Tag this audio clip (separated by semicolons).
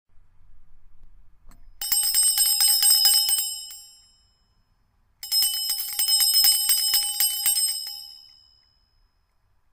Bell; ring; ringing